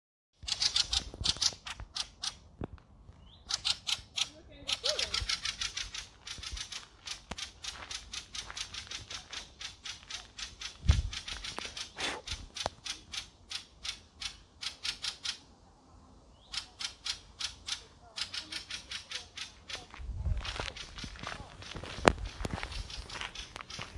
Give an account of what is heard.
Bird call funny
Recording some funny bird noise
bird, call, chirp, Funny, small, tweet